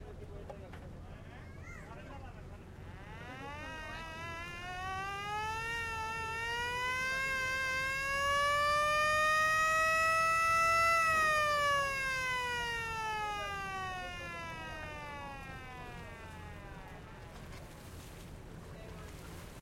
Ro Ro ferry siren before it starts.
Ambience, Ferry, Siren